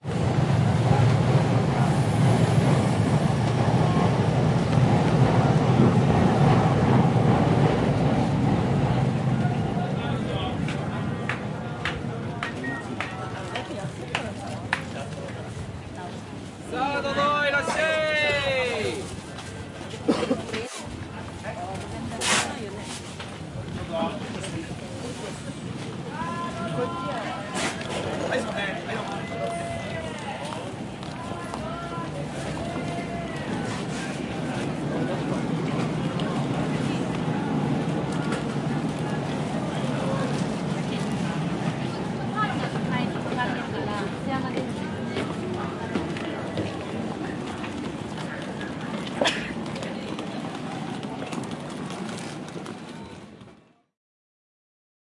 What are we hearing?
Starts and ends with trains arriving overhead into Ueno station, then general market atmos with male spruikers. Recorded in the Ameyoko markets in Tokyo in May 2008 using a Zoom H4. Unprocessed apart from a low frequency cut.